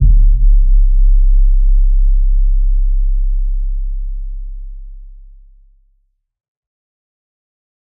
SD Low 10
Low-frequency sound of impact.